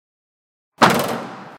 MITSUBISHI IMIEV electric car HATCHBACK close
electric car HATCHBACK close
HATCHBACK, electric, car, close